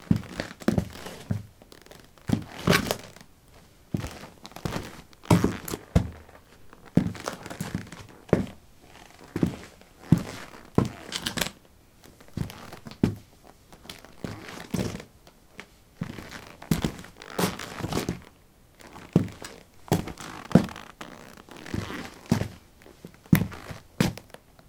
concrete 18b trekkingboots shuffle
Shuffling on concrete: trekking boots. Recorded with a ZOOM H2 in a basement of a house, normalized with Audacity.